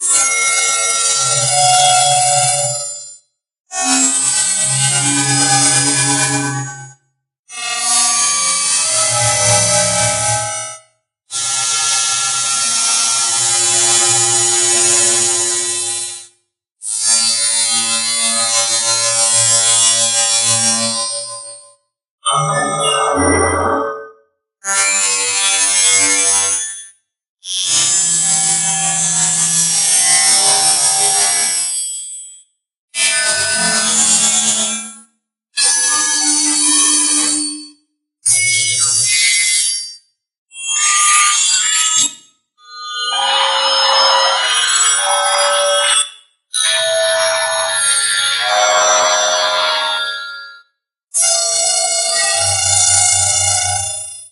Glitchy-Razor SFX
Mastered mini collection of glitchy sounds processed on MaxMSP from an electric guitar feedback timbre-like sound.
glitchy, razor, sfx, sci-fi